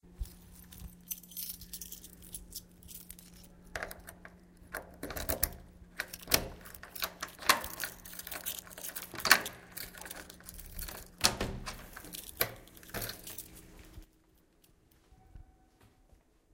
El sonido de la apertura de una puerta desde que se sacan las llaves del bolsillo
The sound of the Openning of the door with the keys.
Recorded with Zoom H1